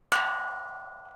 Kirkstall Iron Door.5
One, midi, sampling, recording